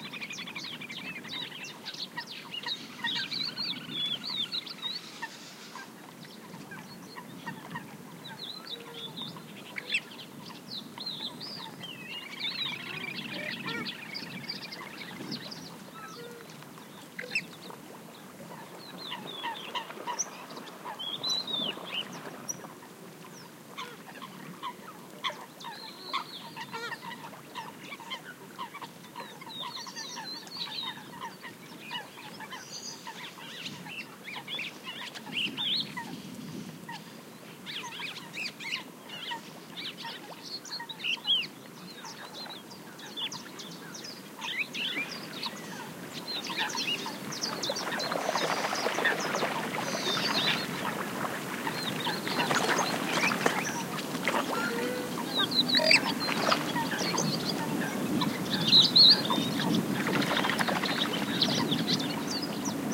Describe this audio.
20070218.el.lobo.ambiance
ambiance near El Lobo pond, Doñana National Park, mostly waterfowl calls.